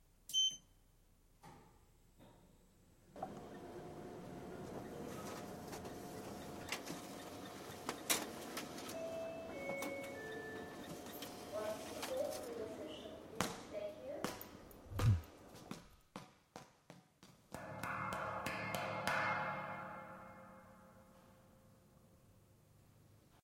tcr soudscape hcfr jules-yanis
France, Soundscapes, Pac